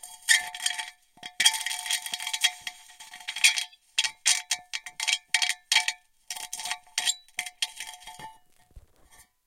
rock in a soda can
recordings of a grand piano, undergoing abuse with dry ice on the strings